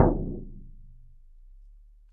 Shaman Hand Frame Drum 12
Shaman Hand Frame Drum
Studio Recording
Rode NT1000
AKG C1000s
Clock Audio C 009E-RF Boundary Microphone
Reaper DAW
shamanic, percussive, hand, shaman, percs, drums, percussion